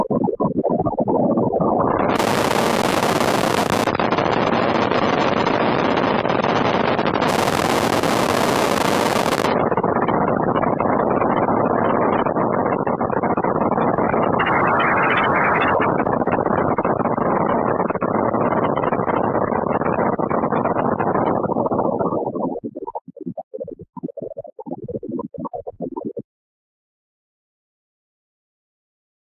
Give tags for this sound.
crisp
digital
experimental
synthetic
bubbly
heavily-processed
raspy
noise
artificial
electronic

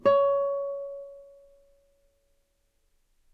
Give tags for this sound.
music
notes
c
strings
nylon
guitar
tone
note
string

